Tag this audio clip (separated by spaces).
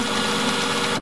mechanical movement printer electronic